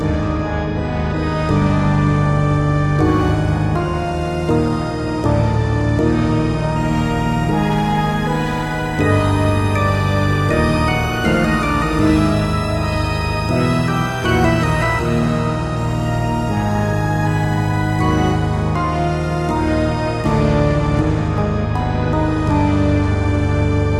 short loops 04 02 2015 4
made in ableton live 9 lite with use of a Novation Launchkey 49 keyboard
- vst plugins : Alchemy
game loop short music tune intro techno house computer gamemusic gameloop classic
classic, computer, game, gameloop, gamemusic, house, intro, loop, music, short, techno, tune